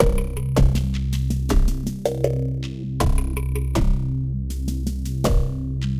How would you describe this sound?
Portobello Loop 1 - 80bpm
80bpm, London